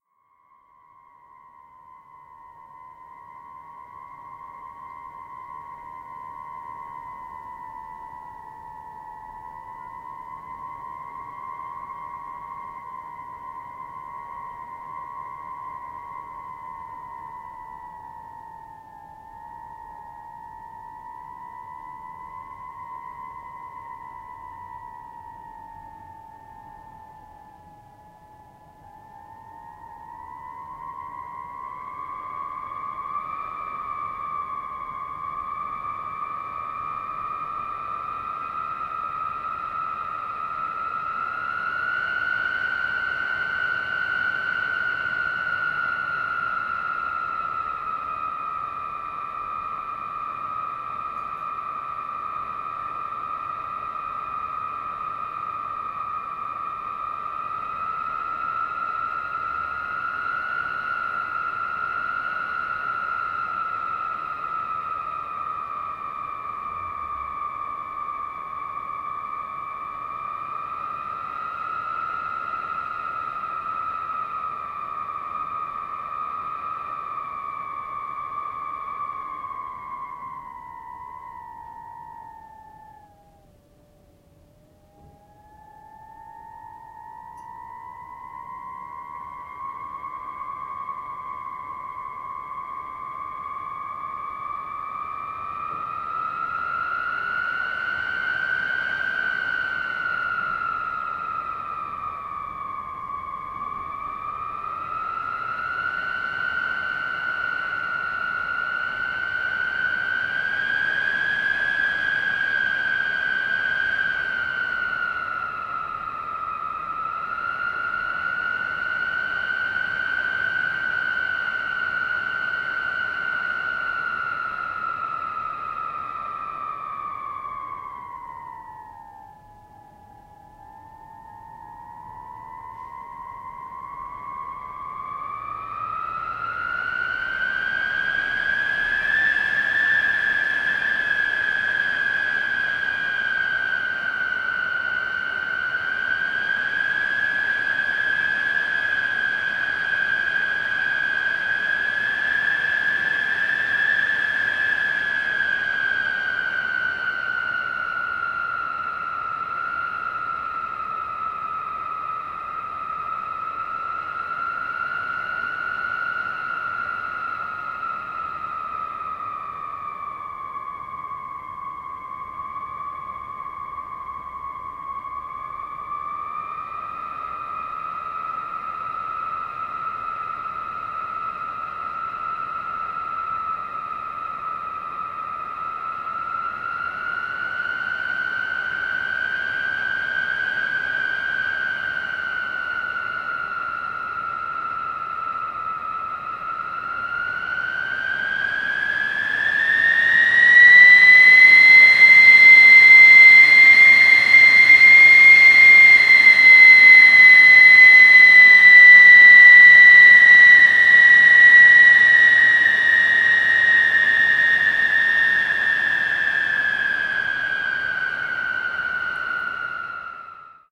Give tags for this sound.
deserted nature old-house storm weather wind

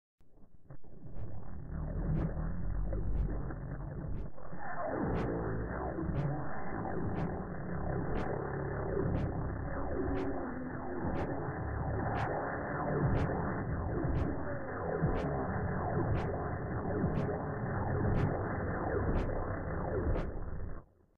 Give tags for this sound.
Bad Manipulation Worst

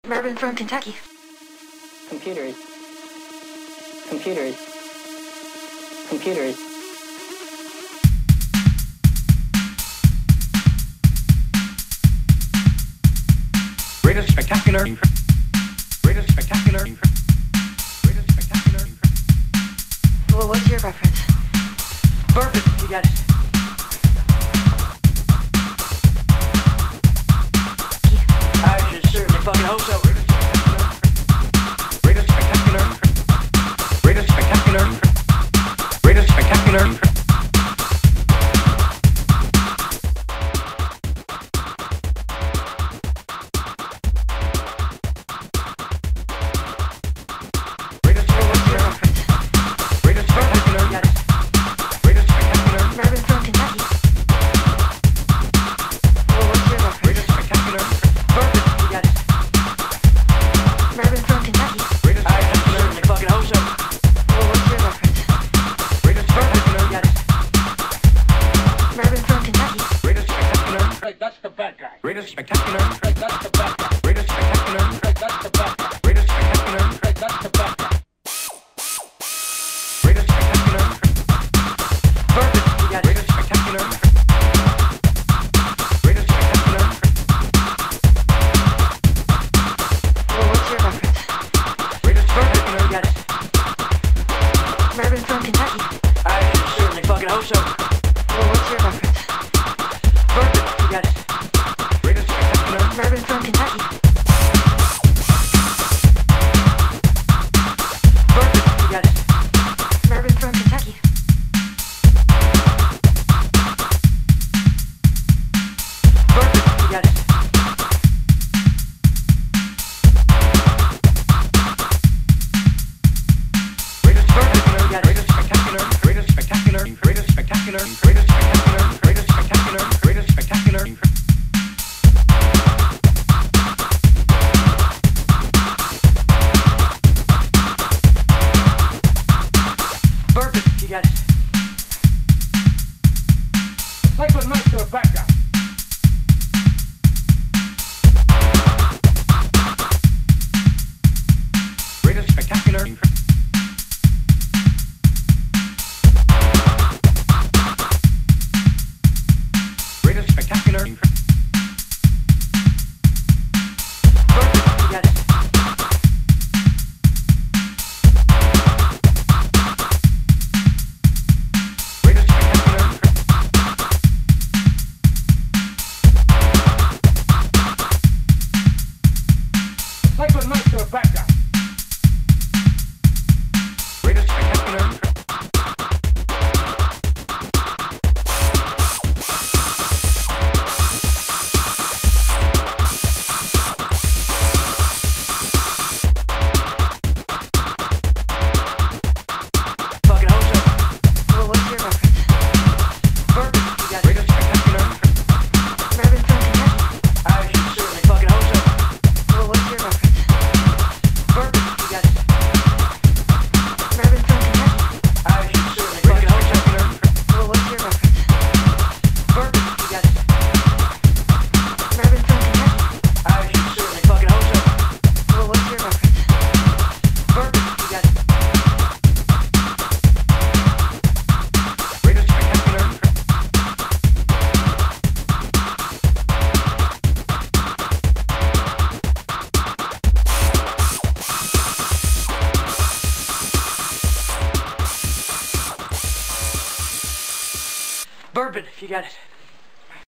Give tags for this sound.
daw drum loops TECHNODANCE

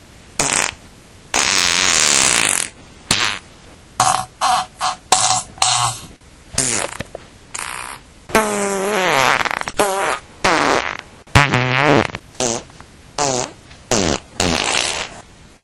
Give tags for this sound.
fart flatulation flatulence gas poot

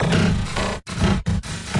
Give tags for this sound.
abstract digital effect electric electronic freaky future fx glitch lo-fi loop machine noise sci-fi sfx sound sound-design sounddesign soundeffect strange weird

